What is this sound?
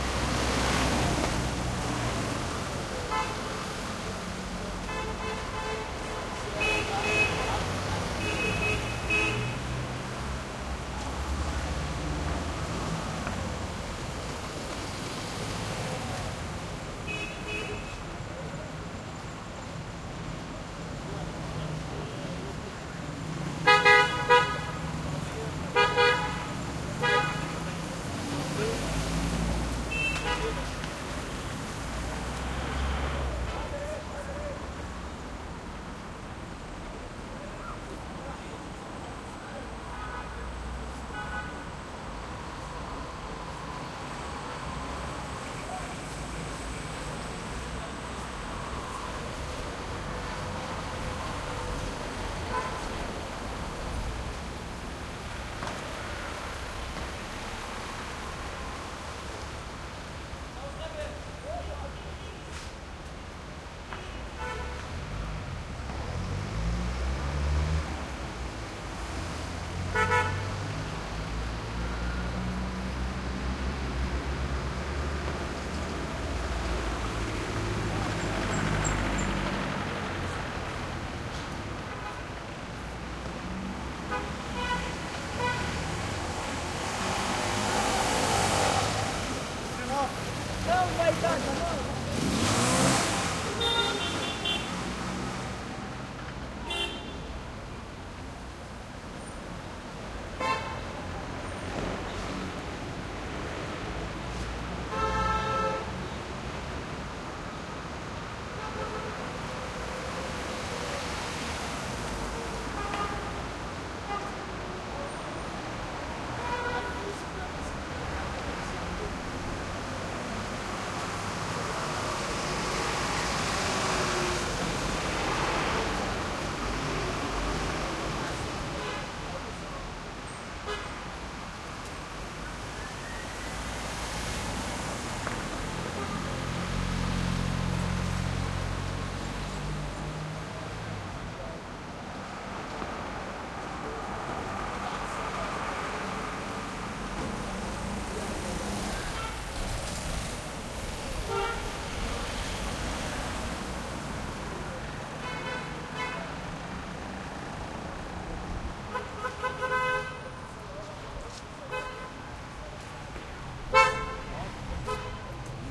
traffic medium Middle East busy street echo throaty cars motorcycles horn honks2 Gaza Strip 2016
busy, cars, city, East, honks, horn, medium, Middle, motorcycles, street, traffic